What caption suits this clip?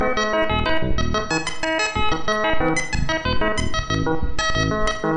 A sample of some kind (cannot recall) run through the DFX scrubby and DFX buffer override plugins
glitch, melody